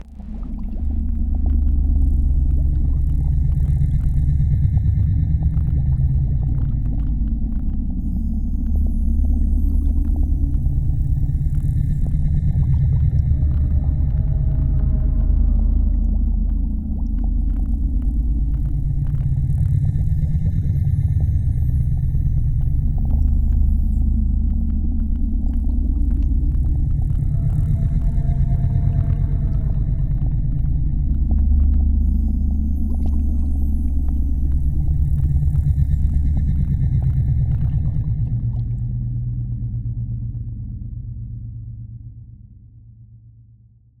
Underwater ambience
ambiance, ambience, ambient, atmosphere, background, ocean, underwater